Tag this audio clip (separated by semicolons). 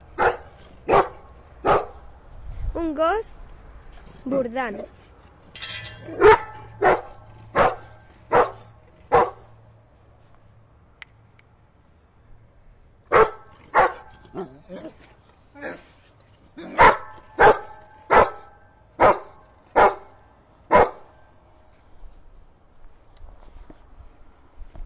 spain; cancladellas; january2013; sonsdebarcelona